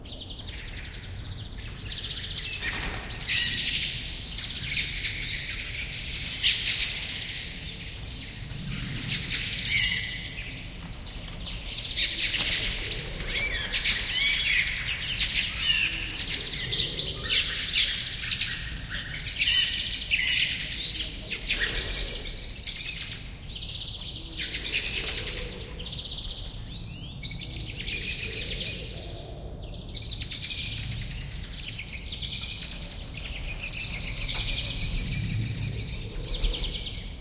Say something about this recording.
Burst of birdsong
Part of a longer, quieter recording. All of a sudden "Hell" broke loose. FR-2le oade recorder and Sennheiser MKH40 microphones.
bird, birds, birdsong, field-recording